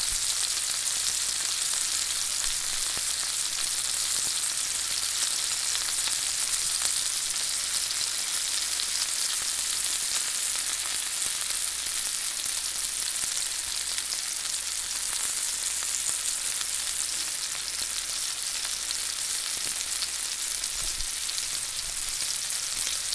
Deep Frying French Fries
recording of a cut potatoes being turned into french fries